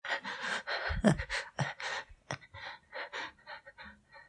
Scared Breathing 1
Sound Originally used for: Curly Reads: Why i wont go to Mockingbird Park [Creepypasta]
Recorded with a Iphone SE and edited in Audacity